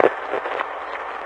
ir wire sub delay
Some processed to stereo artificially. Magnetic wire underwater sources.
response, historical, impulse, vintage